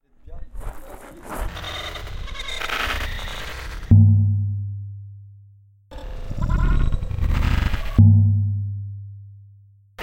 EMBOULE sadia 2015 2016 GREMLINSLOUGHS
I first created a sound,on risset dum basis, then changed the height, plus, I added reverb and integrated echo for more resonance.
fun, Laughs